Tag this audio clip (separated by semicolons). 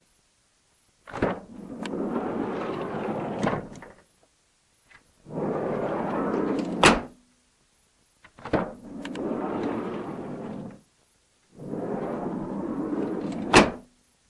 caravan; close; dodge; door; passenger; shut; slam; slide; sliding; van